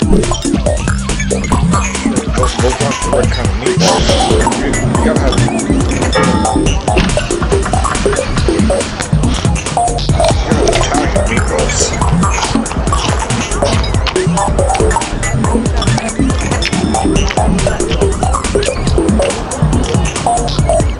I created these perfect loops using my Yamaha PSR463 Synthesizer, my ZoomR8 portable Studio, Guitars, Bass, Electric Drums and Audacity.
All the music on these tracks was written by me. All instruments were played by me as well. All you have to to is loop them and you'll have a great base rhythm for your projects or to just jam with. That's why I create these types of loops; they help me create full finished compositions.
Backing, Bass, Beats, Blues, BPM, Classic, Country, Dub, Dubstep, EDM, Free, Grunge, Guitar, House, Jam, Keyboards, Loops, Music, Rap, Rock, Synth, Techno, Traxis